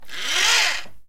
toy car rolling on floor
Recorded in studio near the toy.
Fast and stop